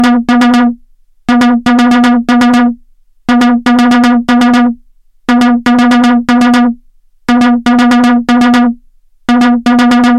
Some recordings using my modular synth (with Mungo W0 in the core)
Analog, Modular, Mungo, Synth, W0